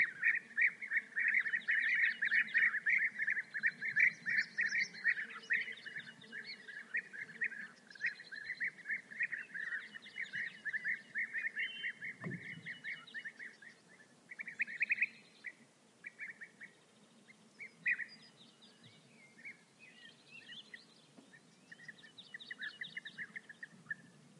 20060328.bee-eaters.02
calls of bee-eaters with some other birds in background. Recorded early in the morning in an opening in Umbrella Pine woodland/ canto de abejarucos y algunos otrso pajaros. Grabado por la mañana temprano en un claro de un bosque de pinos piñoneros
bee-eaters, nature, birds, field-recording, south-spain